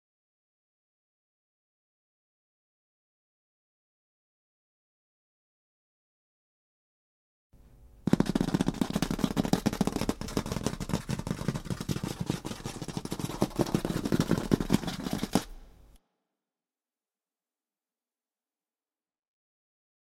Claws clattering on floor
Sound of claws clattering on a wooden floor